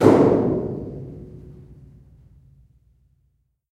One of a series of sounds recorded in the observatory on the isle of Erraid